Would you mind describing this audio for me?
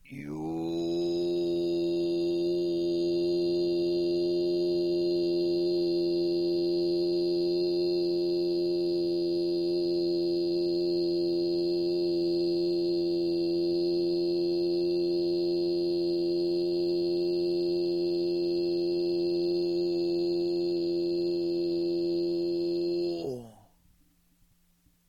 Gyuto Voice 1 (174hz) pure tone-ohh (Broadcast wave format)
192, 24, ambient, bit, clean, frequency, gyuto, hz, khz, meditation, multi-phonic, multiphonic, pure, raw, solfeggio, throat-singing, tone, toning, voice